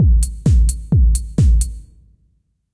I can't decide if this is house or trance. It works great in both genres. Made with a combination of LMMS and Audacity using original samples.
thanks for listening to this sound, number 67302
130, beat, bpm, break, house, loop, trance